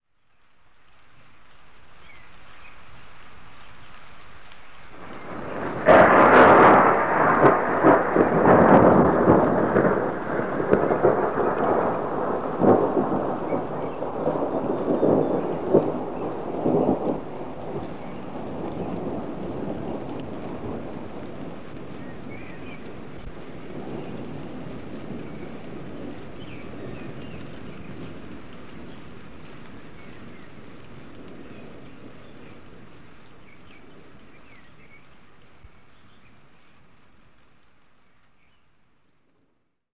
This sound recorded by a Grundig Cassette recorder and a PHILIPS Microphone. It is a bit denoised.Location:Pécel, HungaryDate:18th May, 2005.
lightning, storm, thunder, thunderstorm, weather